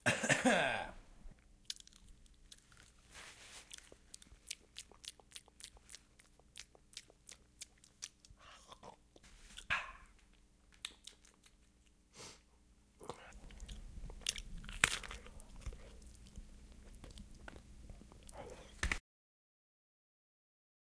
First a cough, then the sound of a man eating food.
food, cough, human, man, body, eating